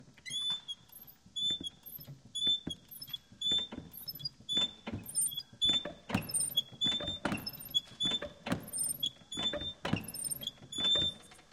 CR DoorHingeSqueak
A squeaky hinge of a door opened and closed repeatedly.
door, metal, hinge